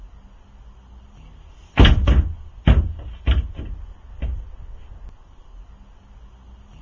lion bounding into trailer
I needed the sound of a lion bounding into a circus trailer for a story I was recording. So I experimented hitting an empty wheelie bin with a broom.
bin, Broom, wheelie